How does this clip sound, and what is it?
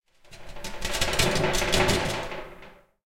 Glass Windows Shaking
In a basement I recorded noises made with a big glass plate. Rattling, shaking, scraping on the floor, etc. Recorded in stereo with Rode NT4 in Zoom H4 Handy Recorder.